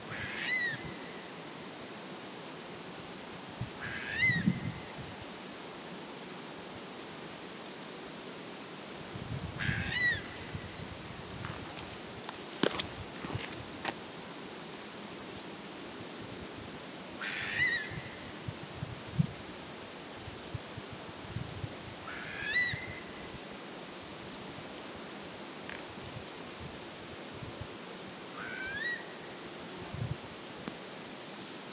Kökar Oppsjön bird
Bird of prey circling around Oppsjön lake on the island of Kökar in Åland, Finland. Recorded from a hiking trail with an iPhone 4S internal mic.
bird, bird-of-prey, Finland, land